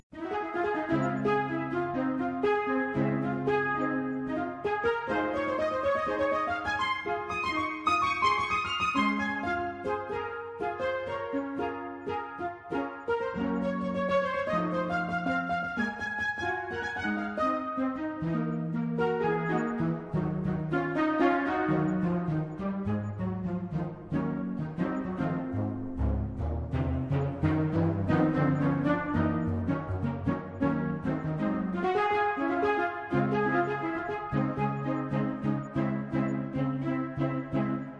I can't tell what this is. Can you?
Jazz Piano and Orchestra EQ
Ambiance, Film, Sample, Movie, String, Orchestra, Ambience, Noire, Jazz, Sound, Recording, Piano, Calm, Cinematic, music